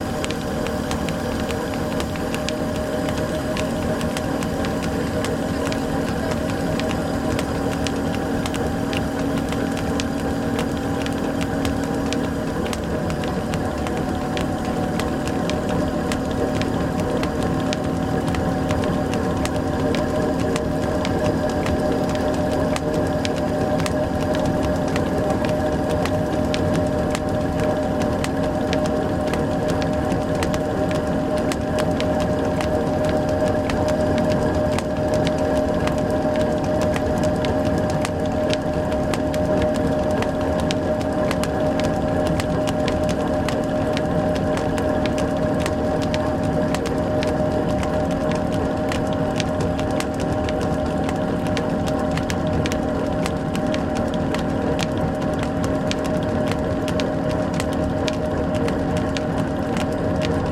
SE MACHINES MILL's mechanism 01
One of the machines in watermill.
rec equipment - MKH 416, Tascam DR-680